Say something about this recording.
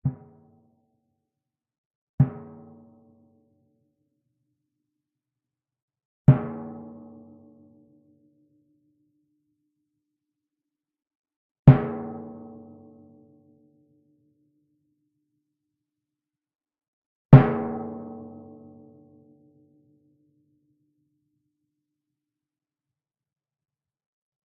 timpano, 64 cm diameter, tuned approximately to D#.
played with a yarn mallet, about 1/4 of the distance from the center to the edge of the drum head (nearer the center).
drum, drums, flickr, hit, percussion, timpani